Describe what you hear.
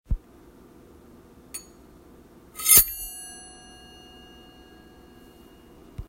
Sound of a metal utensil being quickly drawn across a hard surface and allowed to ring. Could be used as a sword glint kind of sound.